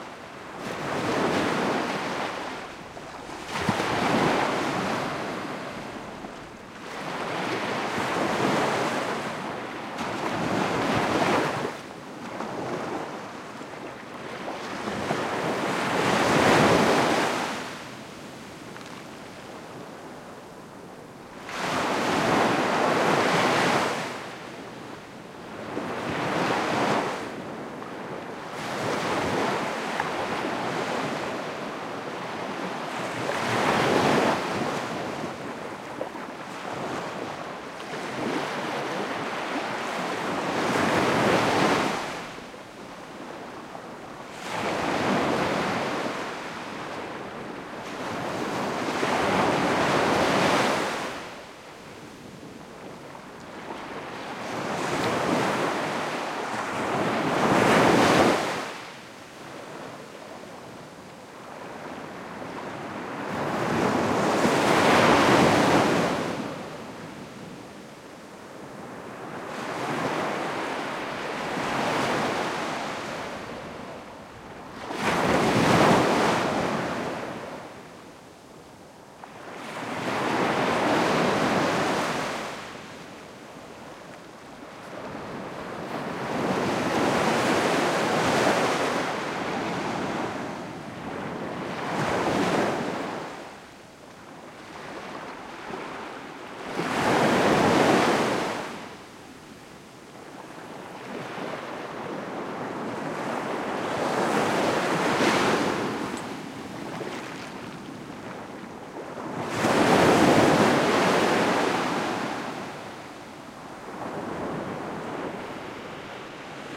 soft waves
Steady wave ambience
ambience, beach, coast, ocean, sea, seaside, shore, surf, wave, waves